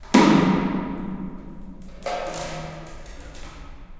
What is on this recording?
A plastic object thrown on a stone floor and rolling around in an empty basement. Recorded in stereo with RODE NT4 + ZOOM H4.